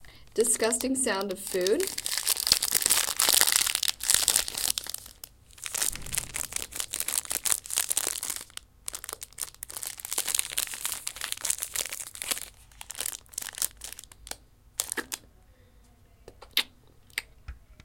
crumpling trash wrappers
wrappers, trash, crumpling